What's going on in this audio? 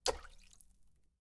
Variations about sounds of water.
SFX, drop, liquid, splash, water